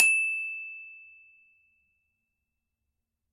Samples of the small Glockenspiel I started out on as a child.
Have fun!
Recorded with a Zoom H5 and a Rode NT2000.
Edited in Audacity and ocenaudio.
It's always nice to hear what projects you use these sounds for.
campanelli, Glockenspiel, metal, metallophone, multi-sample, multisample, note, one-shot, percussion, recording, sample, sample-pack, single-note